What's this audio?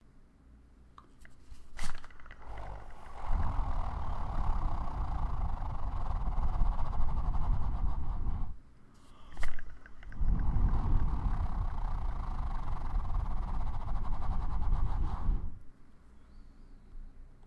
spinBlow against 01
I spun a zoetrope toy while I blew against the motion.
blow, spin, twist, vibrate, whirl, zoetrope